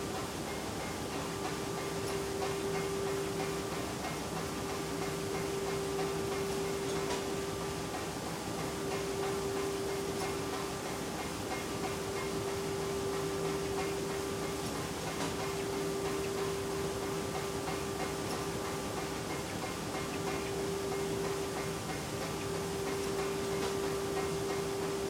cardboard factory machine-011
some noisy mechanical recordings made in a carboard factory. NTG3 into a SoundDevices 332 to a microtrack2.
engine, factory, industrial, loop, machine, machinery, mechanical, motor, robot